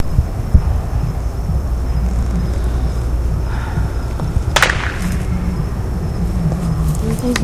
raw firecracker snapp
Fireworks recorded with Olympus DS-40 on New Year's eve 2009.
bang, firecracker, gun, new, pop, shot, years